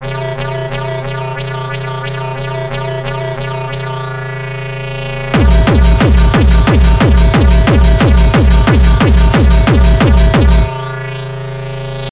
in this sample , i used my own taste i created another 180 BPM sound / melodieand i have put a basssline under neath it with some closed and open hats